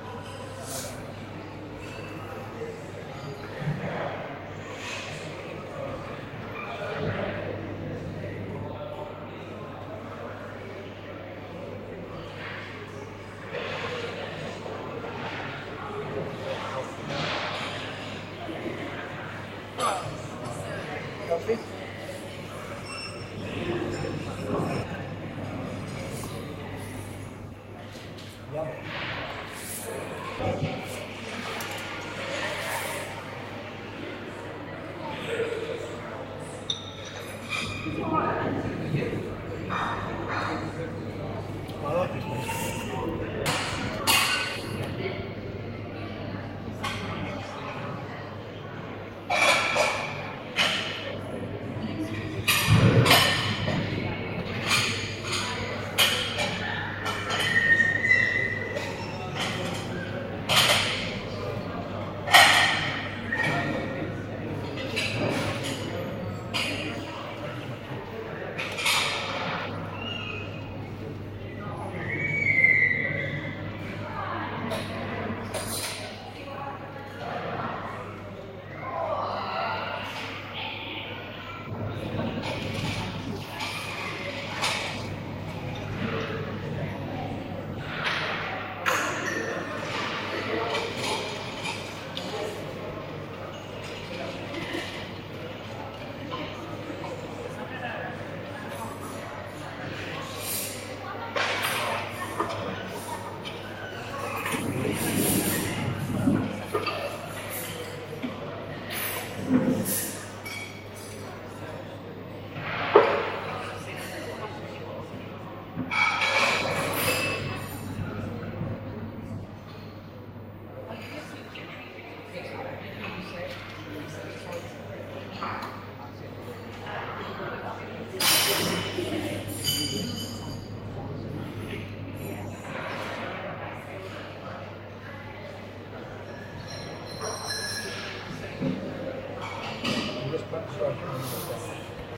Recorded in a cafe in a large Victorian room